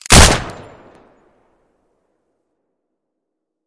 Browning Hi Power
Here's another gun sound I mixed. Hope you enjoy it.
Battle, Browning, CRASHTIMEWARP, Firearm, Gun, Hi-Power, Pistol, Weapon